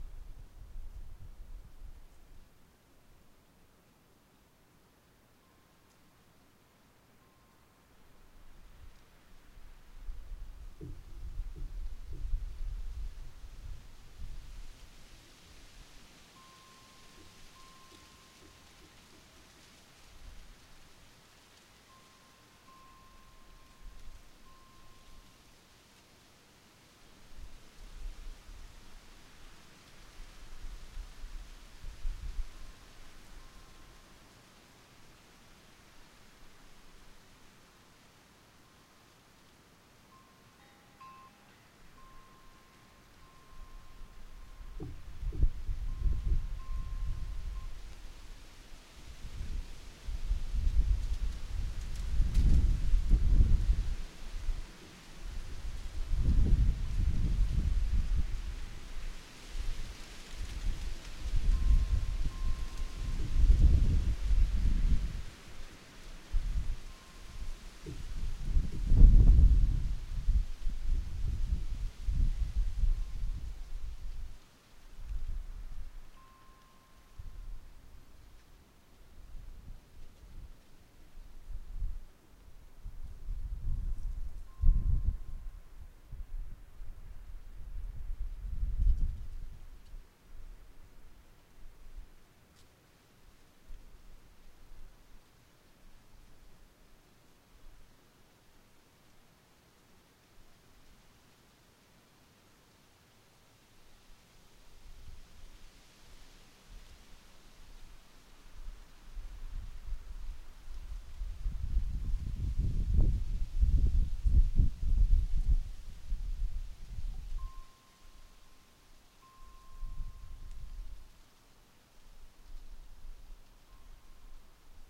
A windy night
wind, beam, ambience, atmosphere, wind-chimes, windy-night